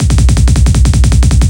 A simple drumroll, meant to be used with the other Psyrolls in my "Misc Beat Pack" in order like this: 1,2,3,4,5,6,7, etc so it speeds up:)
Club, Dance, Drumroll, Psytrance, Trance